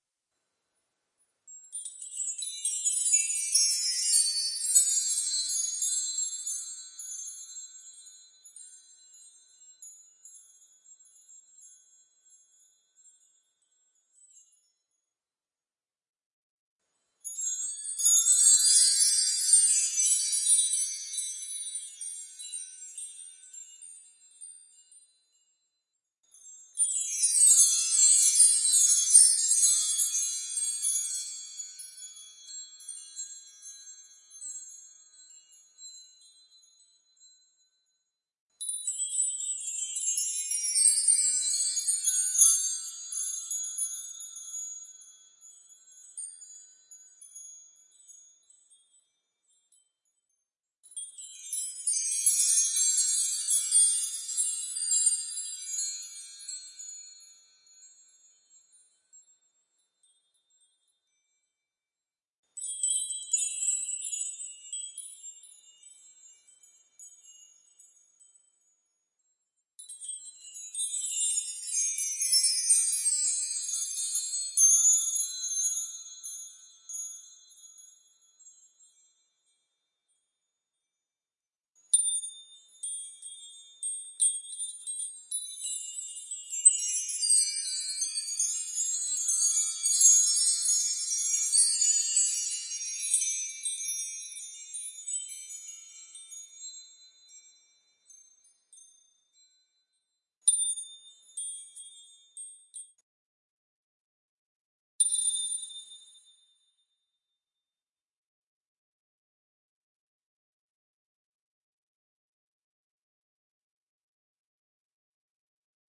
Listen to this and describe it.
Chimes - brass
Wind chimes made by brass tubes played downwards and upwards a few times. Stereo. Ends with a single PING sound.
chimes, wind, percussion